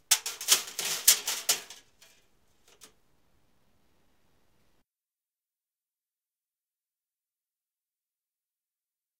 Raw recording of aluminum cans being thrown at a tin heating duct. Mostly high-band sounds. Some occasional banging on a plastic bucket for bass.